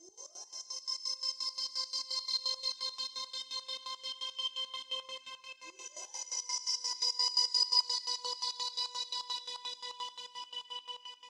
Milky Way CB Trem gate
drum and bass synth loop dnb 170 BPM key C gated
loop, gated, BPM, dnb, 170, synth, bass, key, drum, C